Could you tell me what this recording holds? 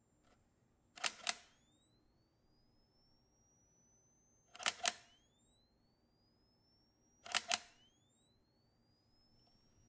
camera flash charge
firing a camera flash and charging.
camera, hum